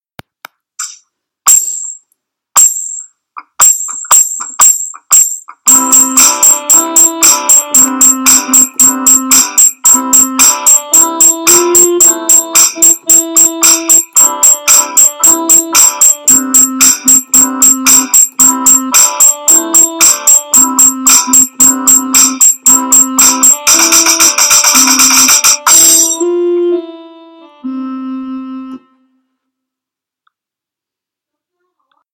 Happy guitar
me playing some guitar